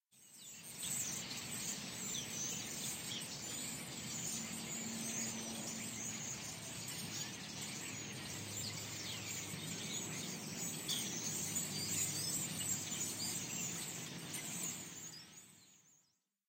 There were so many starlings in this tree that I couldn't even begin to count! Amazing!
chirping, nature, birds, starlings, bird-chirps, chirps